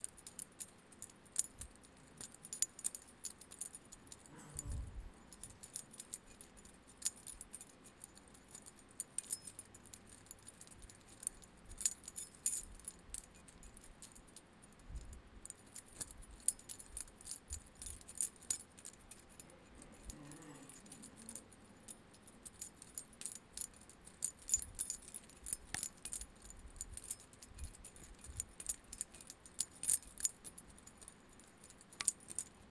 Abstract Soundscape Project
Goland, Sound